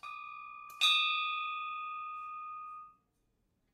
Paolo Soleri windbell from the Consanti bell foundry, Arizona.
bells, chimes, consanti